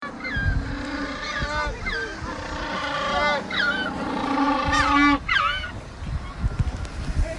African penguins at Boulders Beach
Noisy African penguins at the Boulders Beach nature reserve, South Africa.
beach, bird, penguin